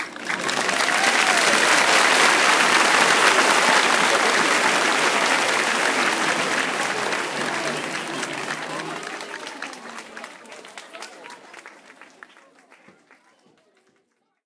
Huge Applause
Quite simple really, just all my applause recordings put together in Audacity.
people, clap